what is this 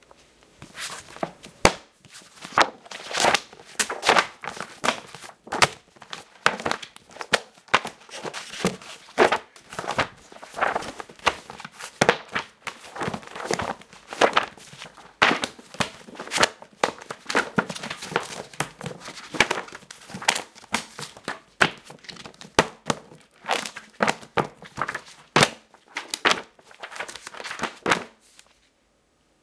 book heavy noise
Large book slapped on ground and tumbled. Recorded to simulate bookcase of books falling over.